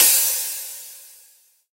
Synthesised percussion sound.